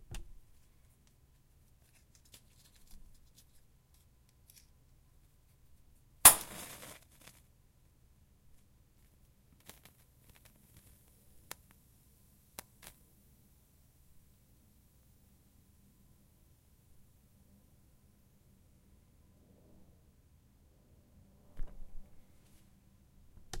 MatchStrike Pop
Field recording of a single match being lit from a matchbook and its burning and sizzling after.
burn, matchbook